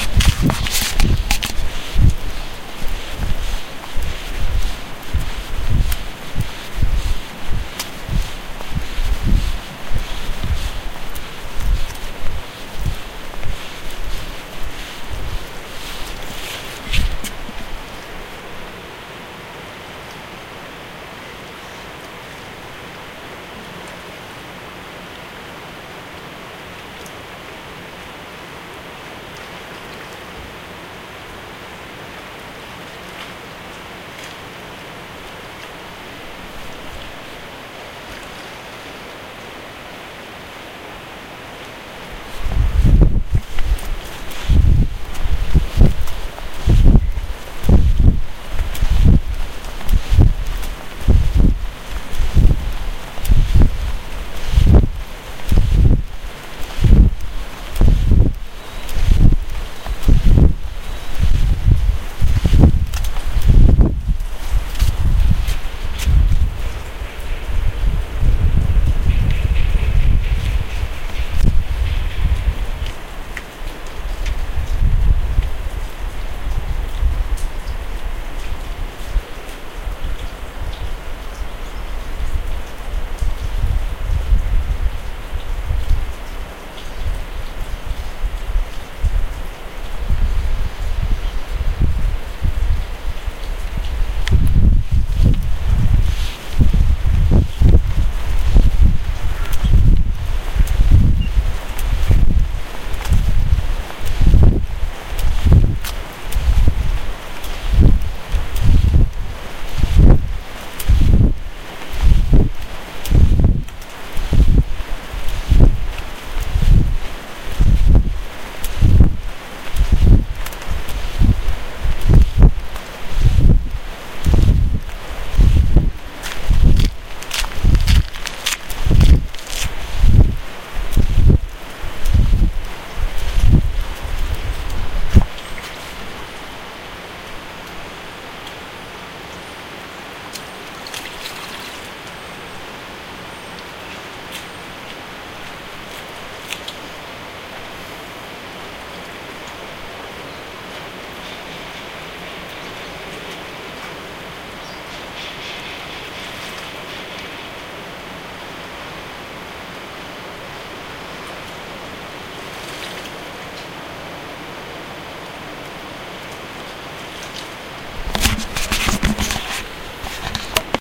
Walking on the dock by the Hudson in the Gantries in Queens in the morning. Dock walking mic wind.